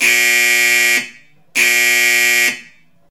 SND Dive Alarm
Alarm! Dive imideately!
alert, dive